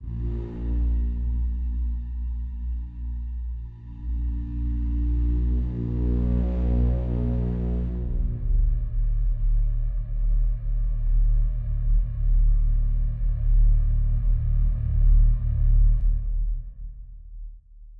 War Horn Horror
Horn horror 2x Note. Software: FL Studio. Bpm 120.
Ambience, Horn, Horror, War, WarHorn, World